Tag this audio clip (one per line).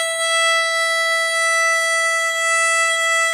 note
string
violin